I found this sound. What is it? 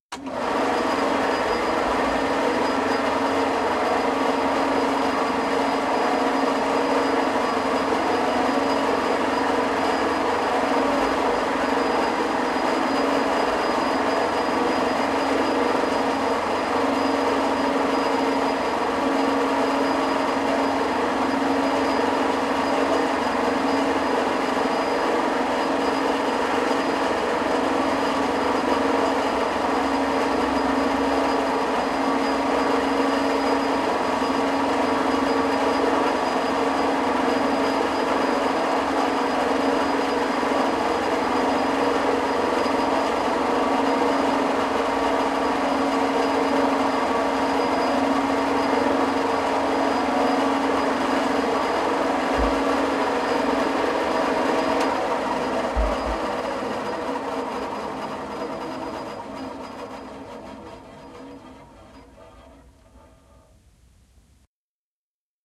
Recording of a woody RBS 300 bandsaw idling. Recorded on ipod touch 3g with blue mikey microphone and FiRe app.
It's just interesting to find out.
Thanks to My Dad, Bernard for letting me record his amazing machines!